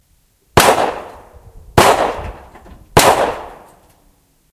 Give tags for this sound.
pistol target 45 field-recording impact fire gun report

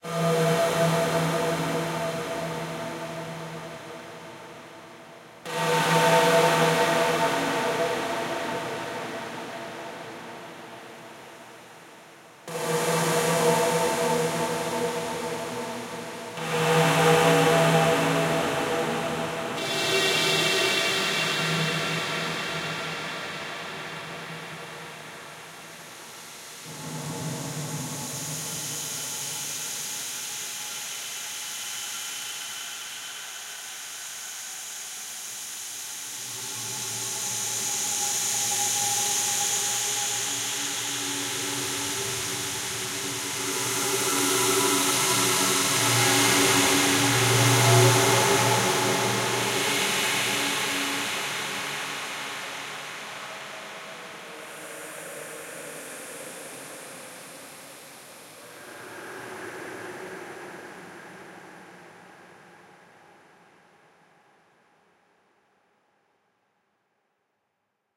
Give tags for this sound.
atmosphere ambience reverb cinematic dark processed

